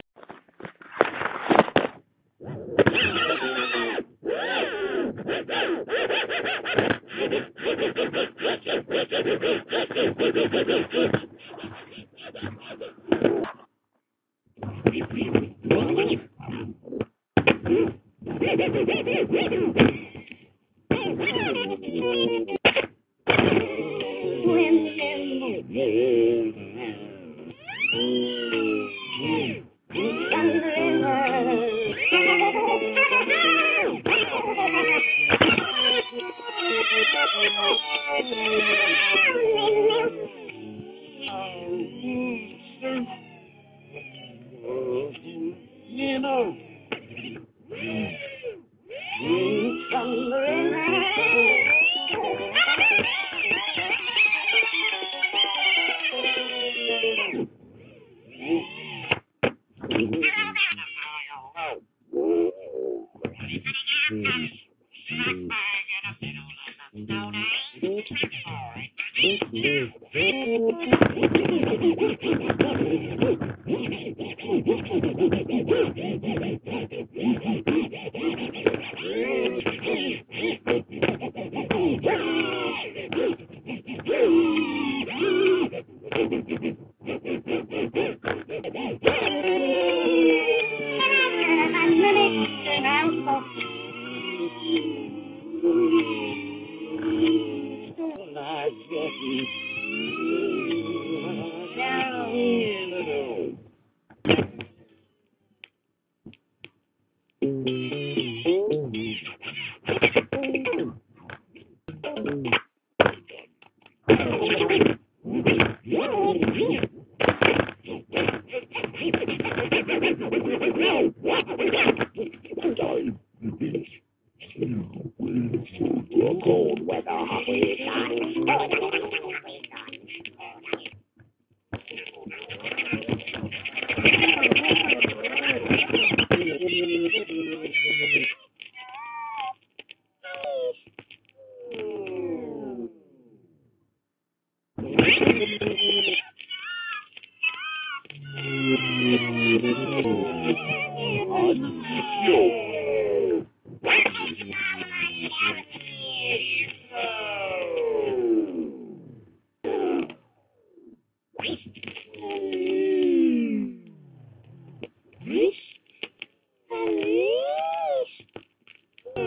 I'm not a DJ but i scratched a record on a stereo